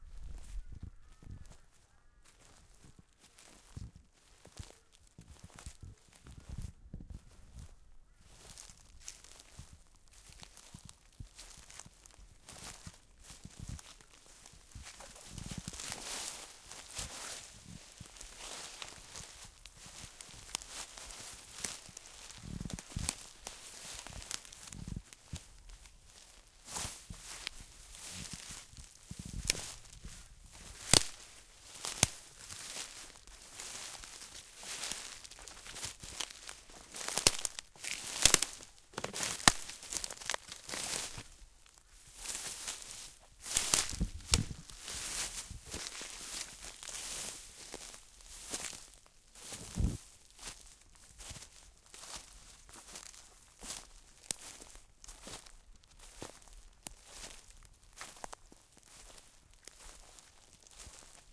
Soft walking through very dry leaves and twigs
autumn, branches, dry, fall, feet, field-recording, footstep, footsteps, forest, leaves, nature, outdoors, path, step, steps, twigs, undergrowth, walk, walking, woods